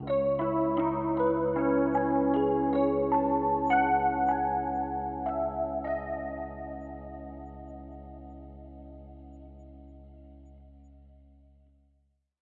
DSV1 SuspensfulRhodes04 C# 77bpm
Much love and hope the community can use these samples to their advantage.
~Dream.
Piano, Ambient, Horror